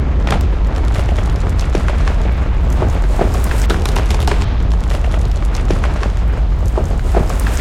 This is a combination of a bunch of sounds to create a very close feeling digging and tearing through earth sound. Thick roots being torn, low rumble, a lot of layers. Used for a video of hands moving through dirt, digging, forming, etc.
dig, low, rumble, thick